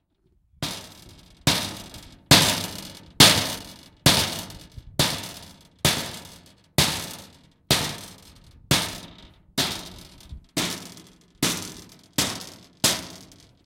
Metallic Rattling Bangs
Friction, Steel, Tools, Hit, Crash, Plastic, Metal, Bang, Tool, Impact, Smash, Boom